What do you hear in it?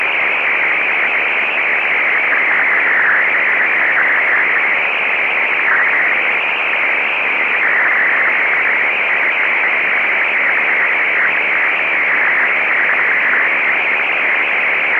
Noise recorded in 40-meter band.